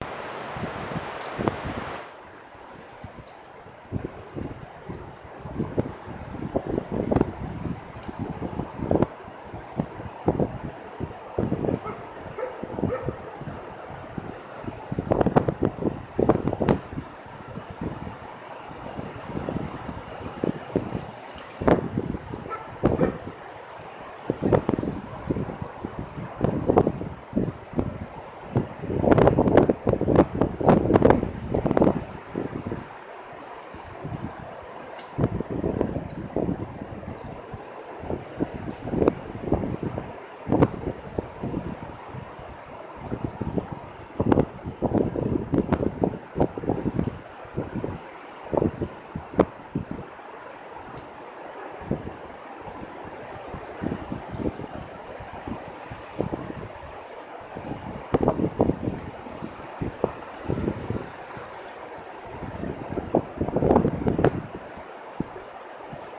Wind in pines Newborough Feb 2014
Wind in pine trees in Newborough Forest, Anglesey North Wales after a storm Feb 2014. Bright sunny day. Recorded by a Nexus 7
bark, dog, wind